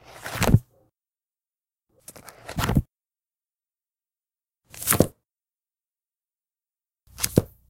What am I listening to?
Recording of me closing a book a few times in the most detailed way i could
Recorded with Sony HDR PJ260V then edited with Audacity